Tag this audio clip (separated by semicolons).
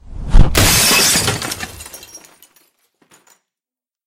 crash; hit; smash; break; shatter; glass; breaking; window